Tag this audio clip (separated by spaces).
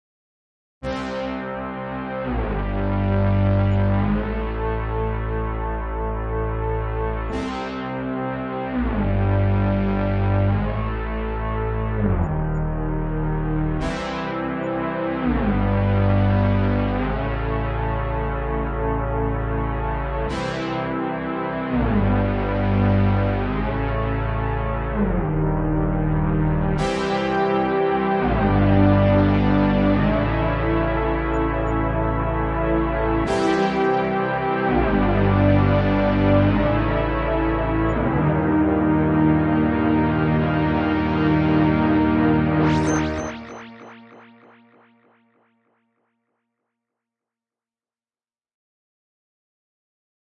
analog brass electronic epic monumental moog oberheim oscillator section synth synthesizer